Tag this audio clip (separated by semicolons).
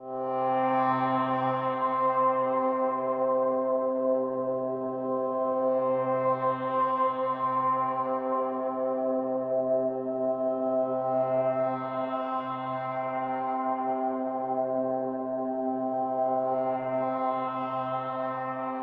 paradise; strymon; atmospheric; pad; symbiotic; analogues; eurorack; modular; analog; waves; minifooger; hybrid; pittsburgh